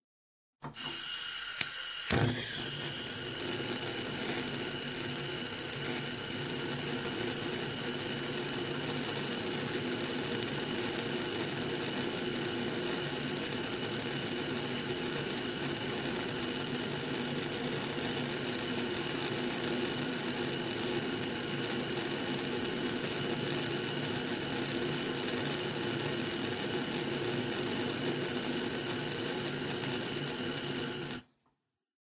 Flame ignition on a gas hob